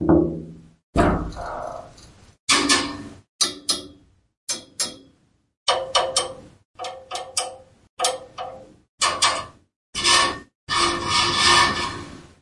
Heater Metal Sounds
Central heating heater unity sounds of it being hit as well as the pipes being struck and hit. Slow down for horror show. Zoom H2n with auto gain (bad choice).
iron, hit, bang, scratching, scratch, creepy, heater, metal, hollow, heating